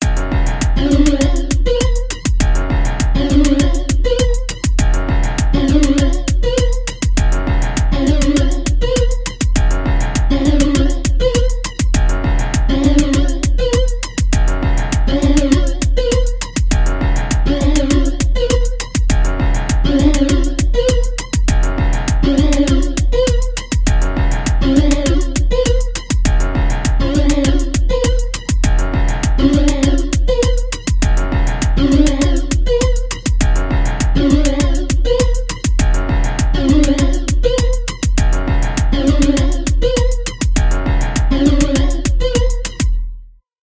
I made this music in fl studio to practice and I think it looked like game music or something like that, is not the best music in the world, but I hope it works for something =)